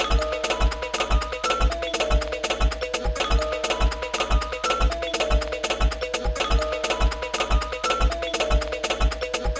random sound collage to build samples up
glitch, idm, breakbeat